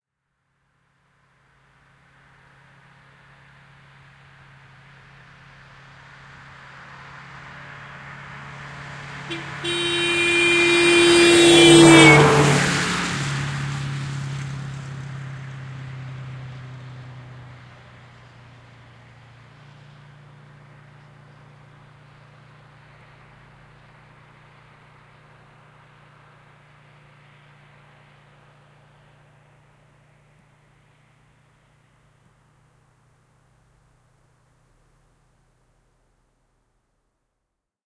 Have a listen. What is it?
Henkilöauto, ohi asfaltilla, äänimerkki, auton torvi / A car passing by, horn honking, doppler, Saab 96, a 1977 model
Saab 96, vm 1977. Ohiajo asfalttitiellä, äänimerkki kohdalla, doppler.
Paikka/Place: Suomi / Finland / Pusula
Aika/Date: 15.10.1981
Auto, Autoilu, Autot, Car-horn, Cars, Field-Recording, Finland, Finnish-Broadcasting-Company, Motoring, Soundfx, Suomi, Tehosteet, Yle, Yleisradio